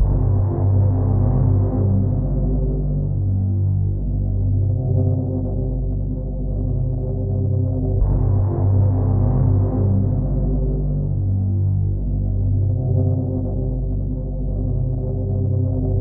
Atmospheric Background 120 C
Drone type sound, mixed timbers. metallic container.
Recorded in FL Studio (D.A.W.) RealTek HD
Casio CTK-2400 AMT.
Usage: Useful for background/mystery suspense works. Submarines. Metal influences. Drifting waves, illusive. dream-state. Dark world.
white-noise, weird, drama, atmosphere, phantom, ambience, pad, drone, suspense, sinister, general-noise, soundscape, background-sound, atoms, space, background, haunted, Gothic, bogey, thrill, atmospheric, ambient, ambiance, atmo, film-audio